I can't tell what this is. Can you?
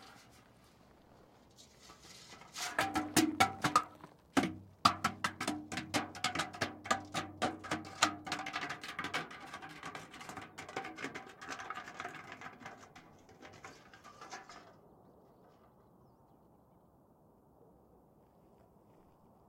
Metal barrel rolling